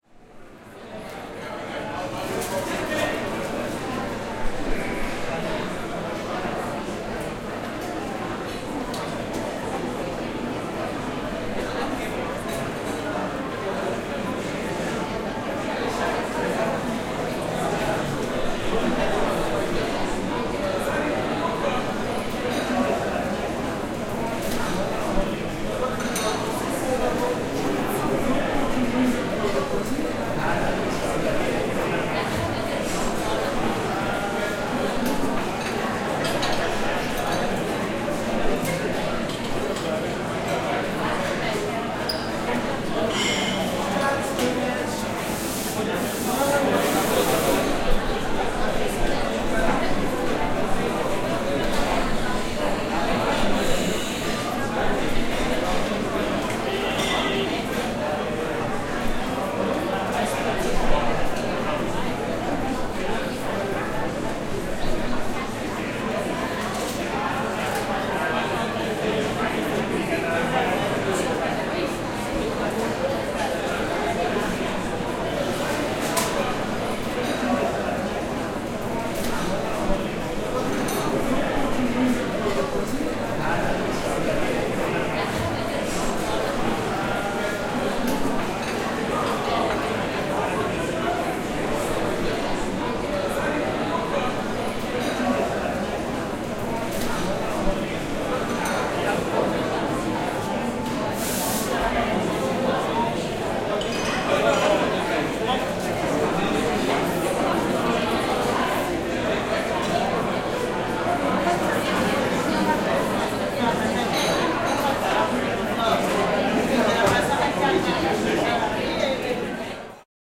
Coffeehouse Ambience: Crowded cafe, people talking, dishes and cutlery clanging, cash register opening, kitchen sounds.